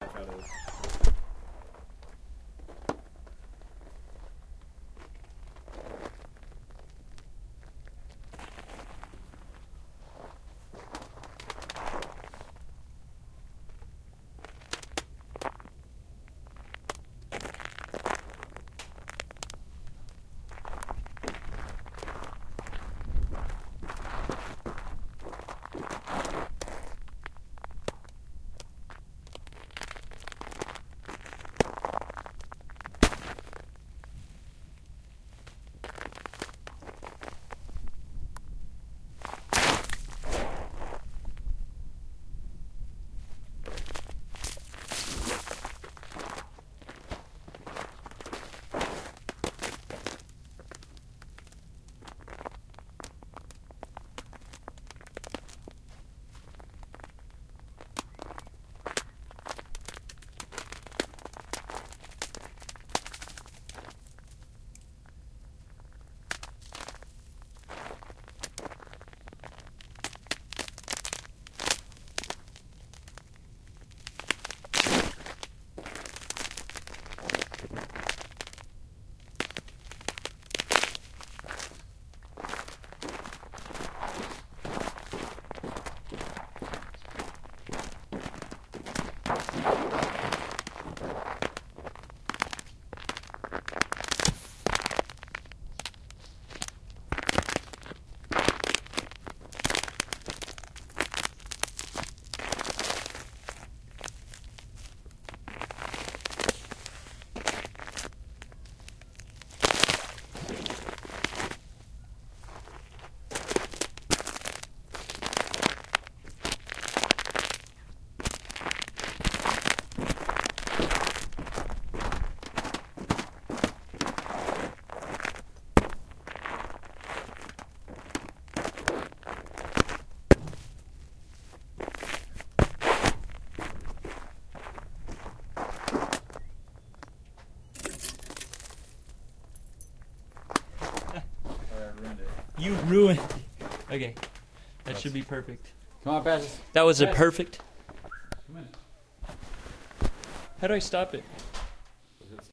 Icy Water - Cracking and Break through
This is a clip of me walking outside on a layer of ice. Underneath is water. When I step it sounds as if the ice will give, towards.
1:10 it makes crack and splash sounds/
1:50 I actually break through the ice and splash into some water.